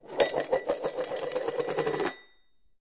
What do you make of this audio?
metal bowl - spinning - upside down 04
Spinning a metal bowl on a laminate counter top, with the bowl upside down.